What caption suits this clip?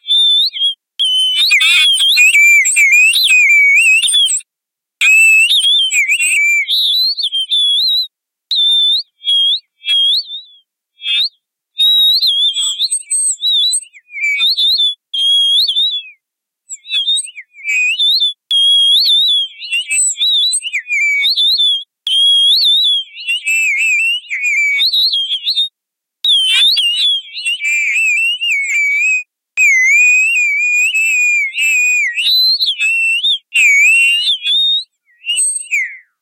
Alien birds warbling.